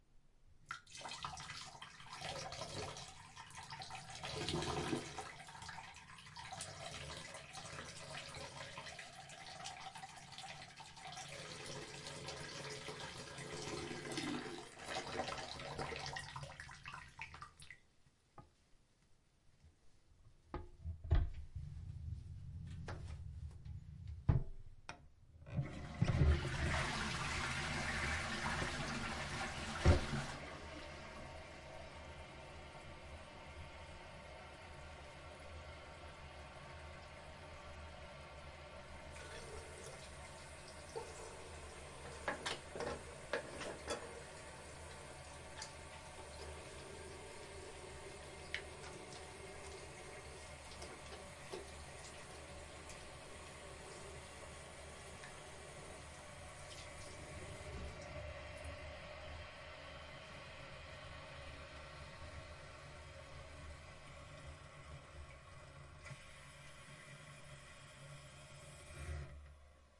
Peeing + flush
A person peeing, flushing the toilet and washing their hands. Might be useful for... something.